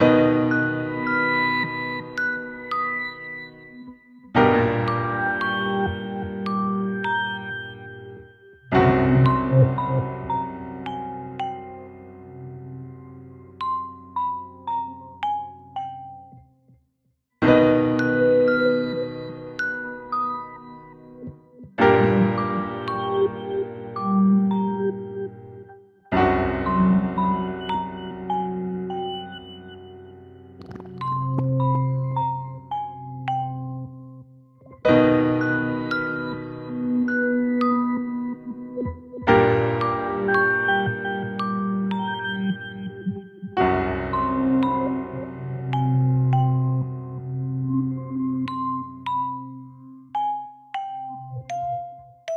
A creepy tune I made in GarageBand for a show called Victors Crypt. I did some chords first on piano followed by some sound effects and melody in the background. I think it might be usefull in something spooky, alien-like, strange... Anyway I hope you like it